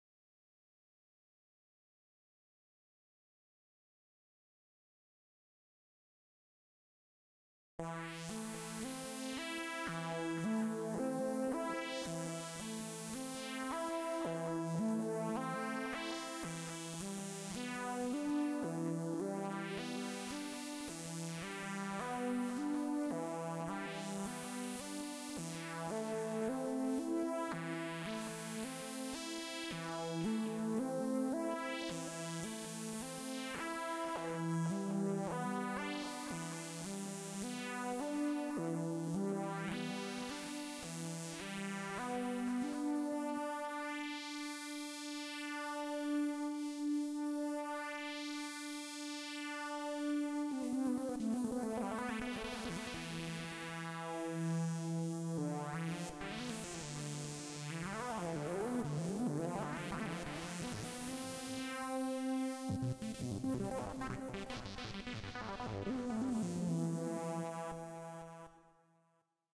Random improvised segment rendered during testing of software after setting up laptop for music... just in time for power plug to start malfunctioning.
digital, sequence, synth, synthesizer